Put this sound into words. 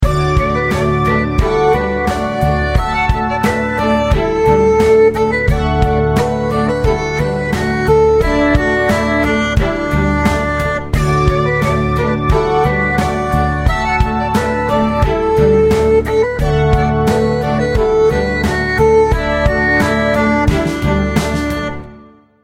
Menu Music Loop. The loop has a Japanese feel to it.
drums, traditional, dojo, Menu, erhu, music, loop, string